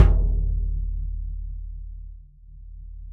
BD22x16-MLP-O~v06
A 1-shot sample taken of an unmuffled 22-inch diameter, 16-inch deep Remo Mastertouch bass drum, recorded with an internally mounted Equitek E100 close-mic and two Peavey electret condenser microphones in an XY pair. The drum was fitted with a Remo suede ambassador batter head and a Remo black logo front head with a 6-inch port. The instrument was played with a foot pedal-mounted nylon beater. The files are all 150,000 samples in length, and crossfade-looped with the loop range [100,000...149,999]. Just enable looping, set the sample player's sustain parameter to 0% and use the decay and/or release parameter to fade the cymbal out to taste.
Notes for samples in this pack:
Tuning:
LP = Low Pitch
MLP = Medium-Low Pitch
MP = Medium Pitch
MHP = Medium-High Pitch
HP = High Pitch
VHP = Very High Pitch